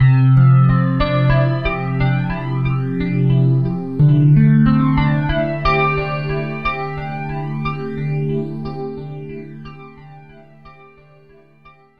A simple musical phrase in C.
12-step-phrase, echo, musical-phrase